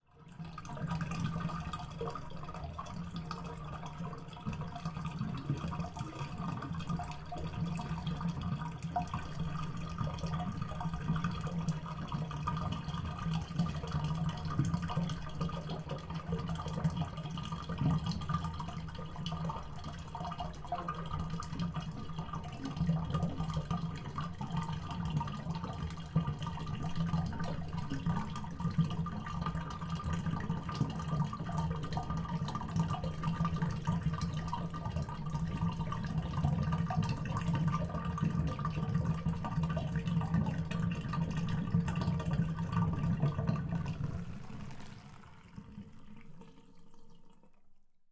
Water draining out of a bathroom tub.
bathroom, drain, bath, water, tub